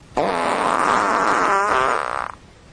complaining fart
fart, flatulation, gas, poot, flatulence